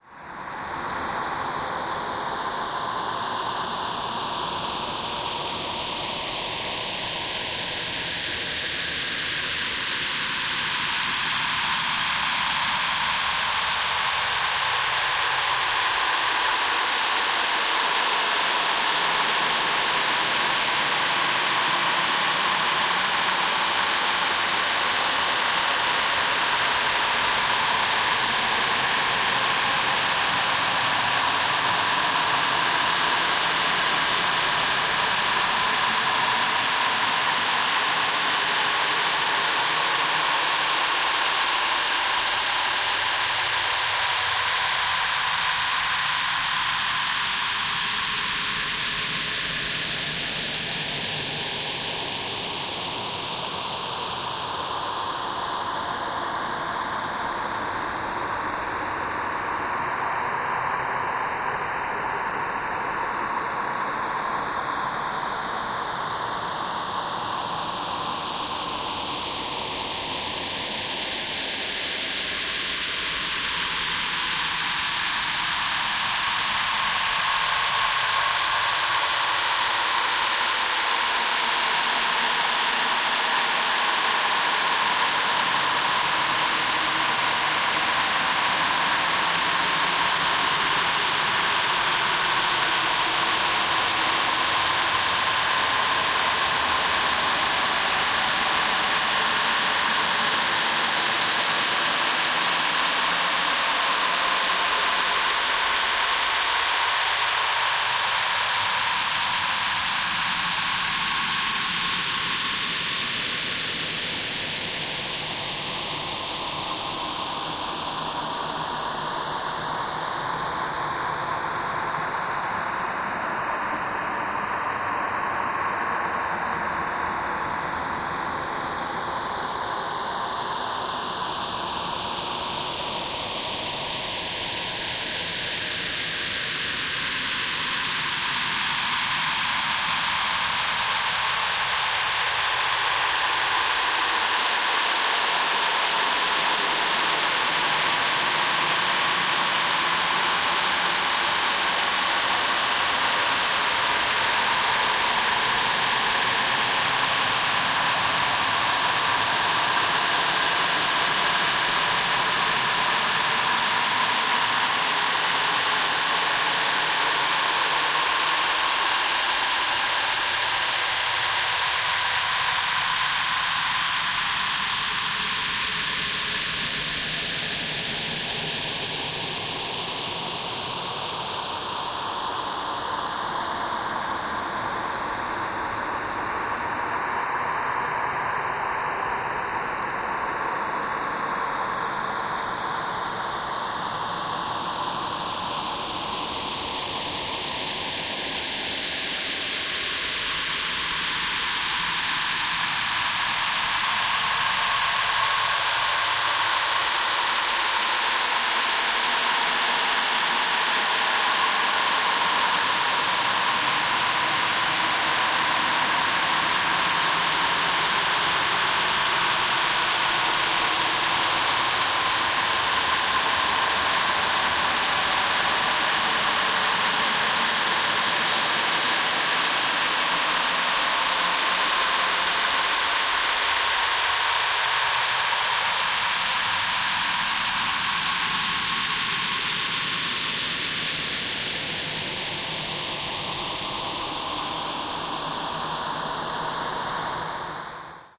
Endless Shower Delta w
sound-art, electronic